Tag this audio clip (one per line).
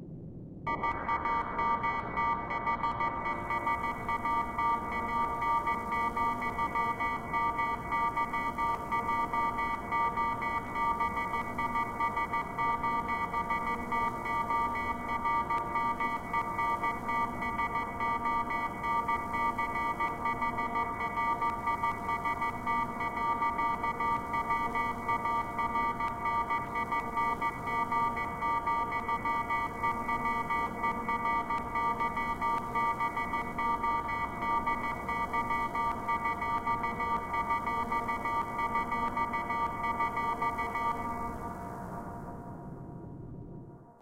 black-metal
code
dark
hell
message
morse
nocturnal
satan
scary